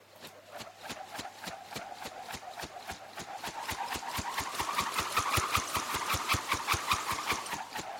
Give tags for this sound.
swish; swoosh; spin; woosh; whoosh; whip; multiple; Cable; swhish; swing

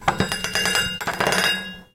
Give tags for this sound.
iron; metal; metallic; rinkles; rough; roughly; rugged; structure; texture; textures

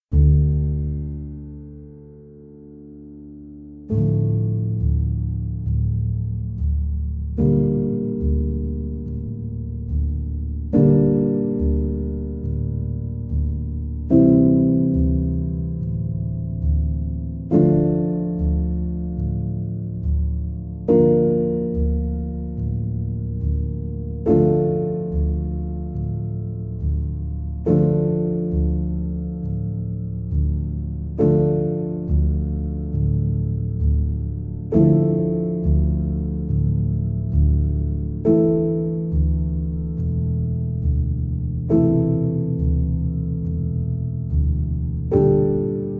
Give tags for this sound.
Accoustic; Amb; Ambiance; Ambient; Atmosphere; Cinematic; Creepy; Dark; Drama; Fantasy; Film; Horror; Instrument; Movie; Music; Piano; Sample; Scary; Sound-Design; Spooky; Strange

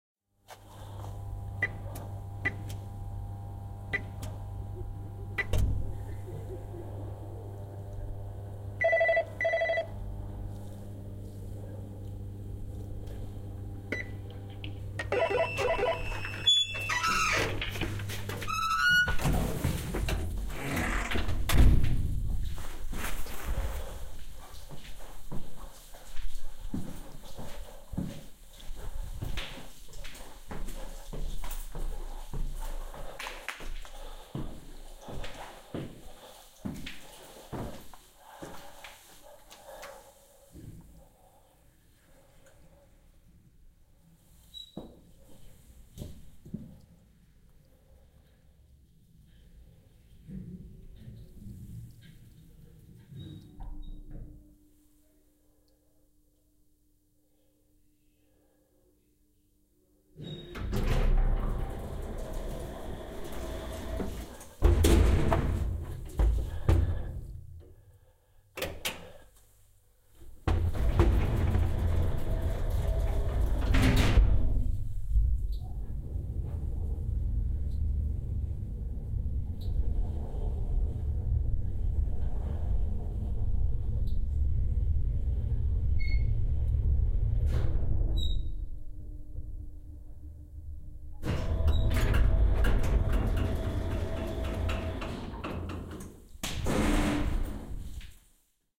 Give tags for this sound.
close; door; doors; elevator; entering; lift; metal; open; opening; stairs

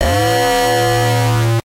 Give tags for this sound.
Mutagen; Alarm

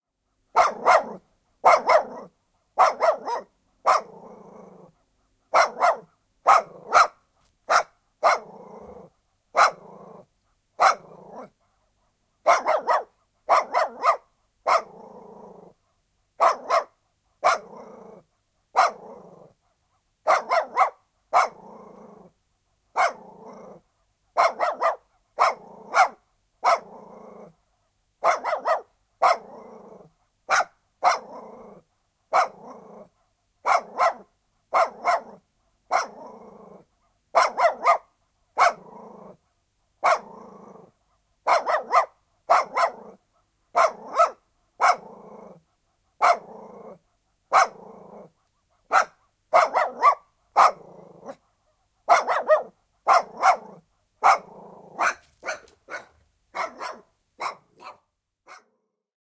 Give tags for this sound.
Soundfx; Finnish-Broadcasting-Company; Haukkua; Koira; Haukku; Animals; Field-Recording; Barking; Yle; Dog; Pets; Tehosteet; Finland; Yleisradio

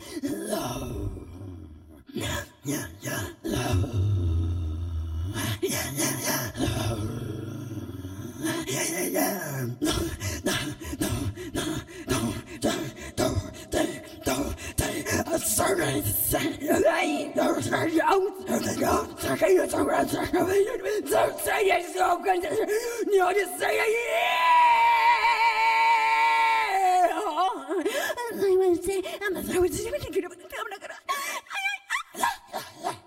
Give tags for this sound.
Inuit; sample; singing; throat

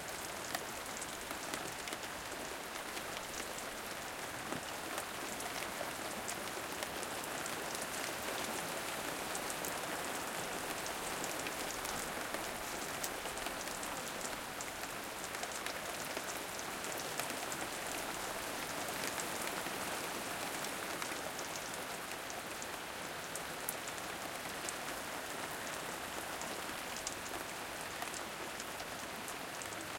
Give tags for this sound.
drip; drops; field-recording; garden; nature; rain; raining; terrace; water; weather

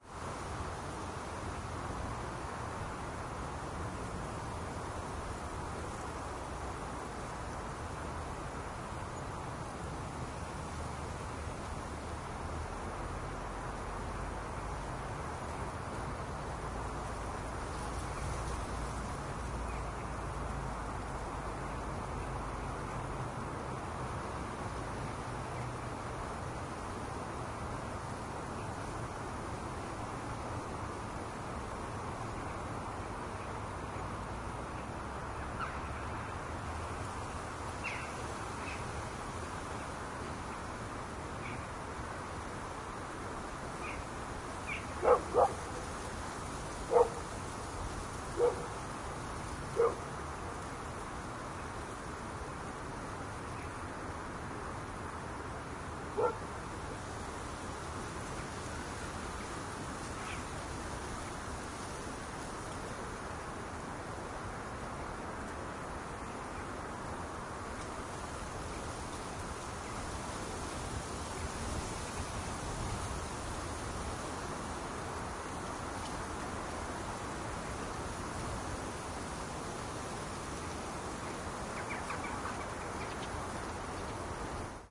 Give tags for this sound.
france; mistral; swift; grass; insects; bark; aubagne; dog; wind

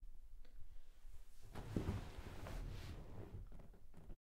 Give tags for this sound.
Chair; couch; Leather; OWI; sitting; Squeak

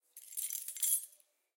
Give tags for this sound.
sfx; keyinsert; jingle; keylock; keyunlock; keychain; handling; turn

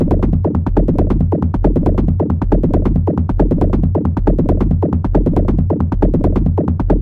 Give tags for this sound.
loop; techno

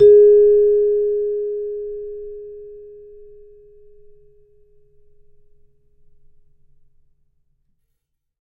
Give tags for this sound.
celeste; samples

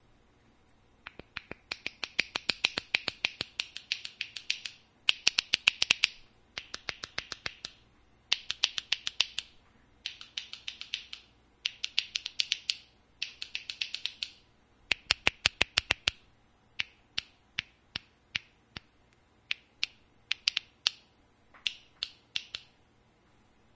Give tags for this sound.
button; popping; cap; bottle

